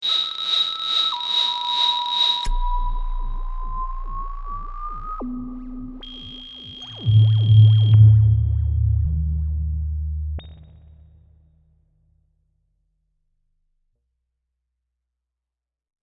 Sci-Fi Alien Mystery
THE DARK FUTURE
Dark Suspenseful Sci-Fi Sounds
Just send me a link of your work :)
Alien, Alien-Species, Contact, Making, Outer-Space, Paranormal, Radio, Sci-fi, Strange, Unusual, Vocal, Weird